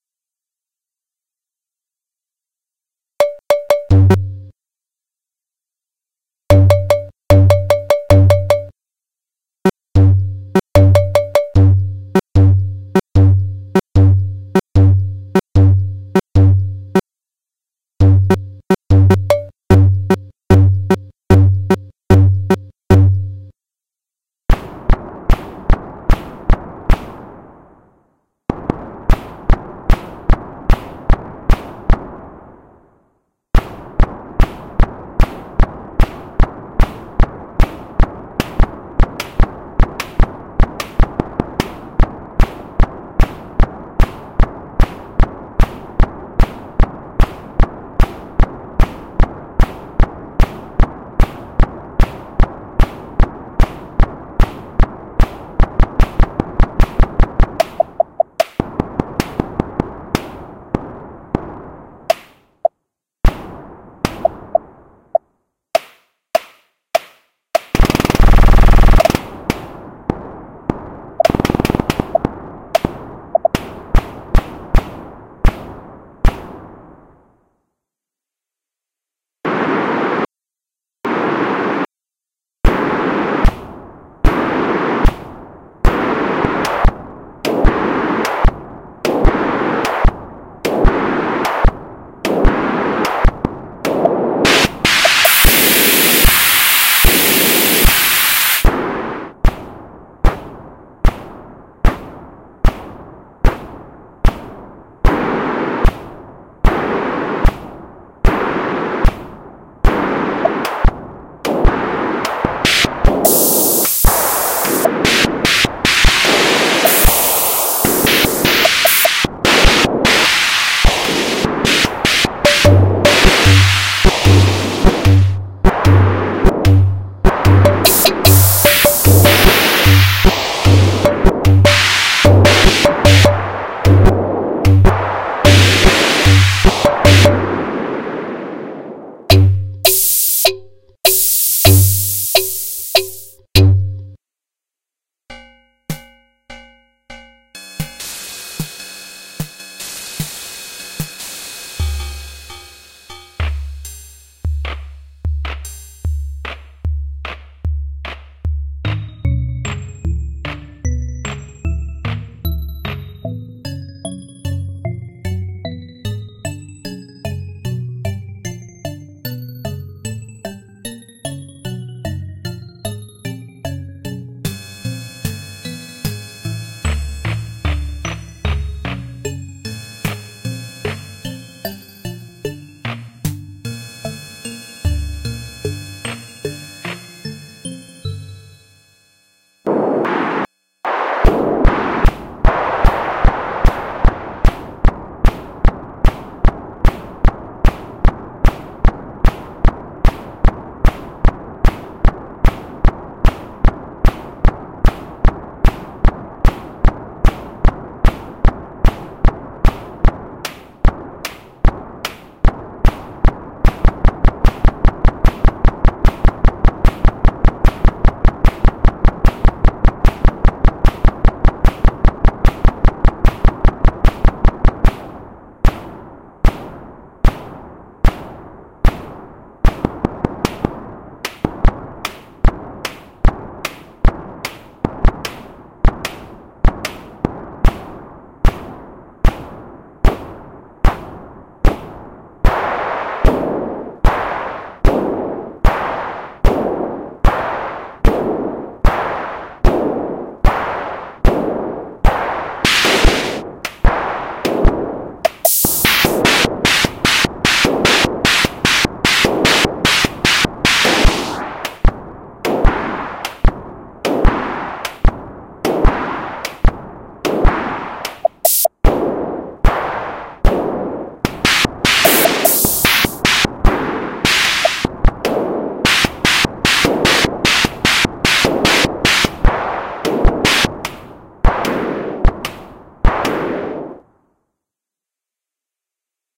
znet_sequencer 0.1 Puredata patch that generates different sounds with a "ip sequencer". Network traffic is captured by pdpcap, ip sequencer generates a different bang for each protocol (ip, tcp, udp and icmp), and the sound system has the assigned sound for them (sampler, drums, noises, etc...)
So, the "music" (or noise) is generated by a sequencer based on IP network traffic, so as we surf the web it generates a song.